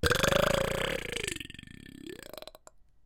A burp that sounds like it ends with 'yeah'.
burp, belch